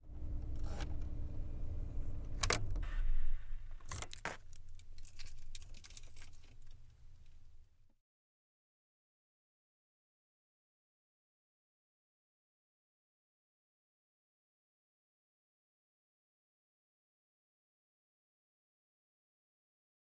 CAR TURN OFF
car being turned off